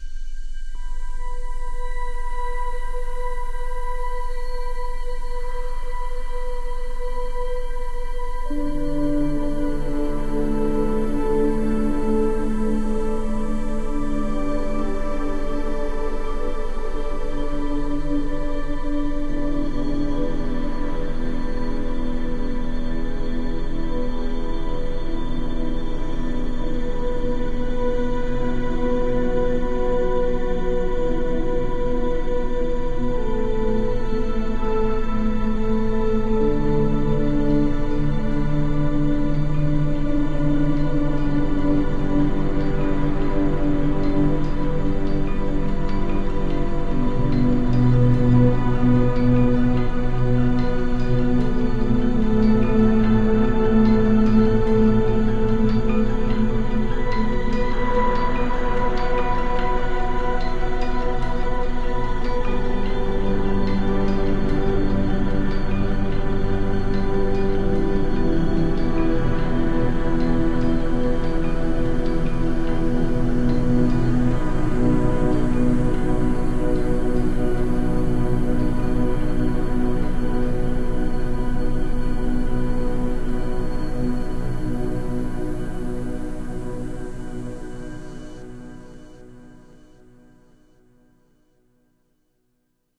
AMBIENT LIVE PLAY
ambient, pad, soundscape